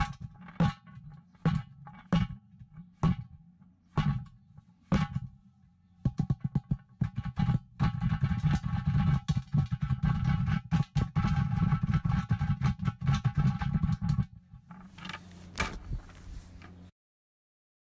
INSIDE BUCKET
This is a record inside a bucket with hands patting on the outside to mimic a thunderstorm.
bucket, chamber, drumming, drums, hollow, storm, thunder